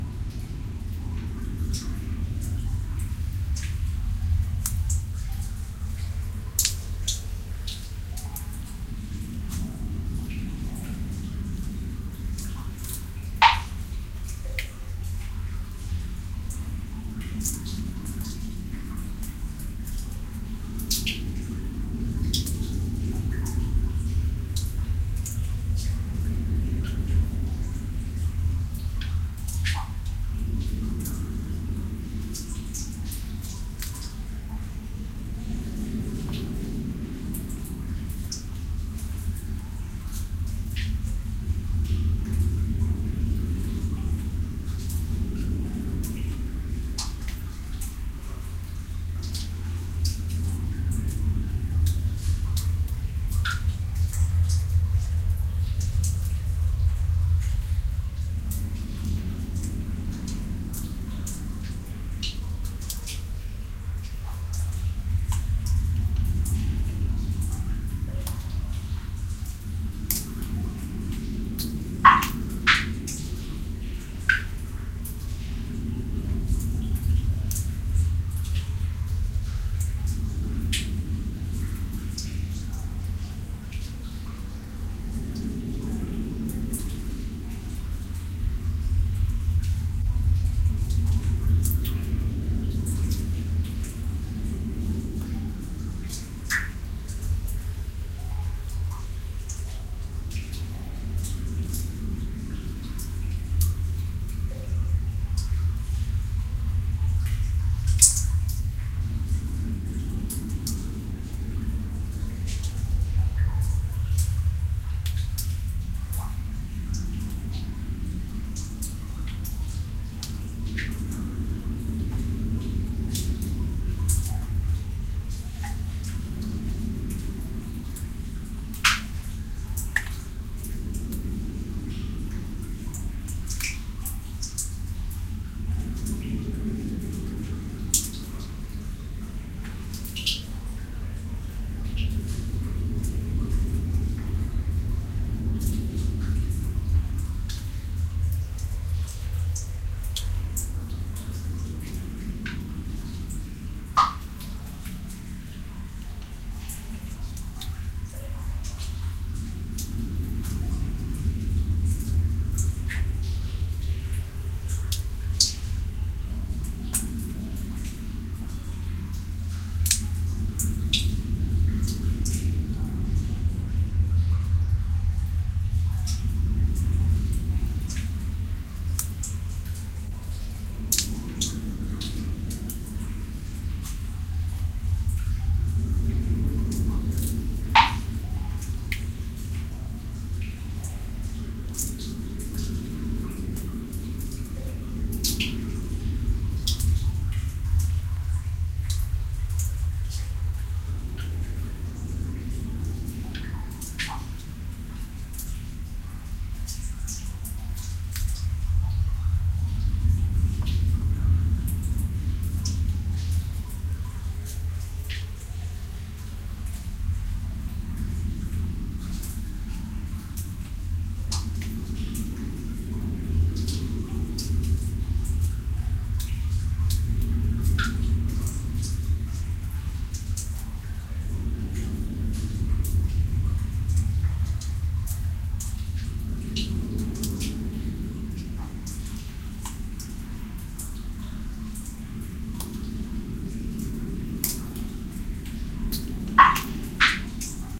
This is a mix of audio I put together to create some cave ambiance. It features a low rumble and wind along with the echos of running and dripping water.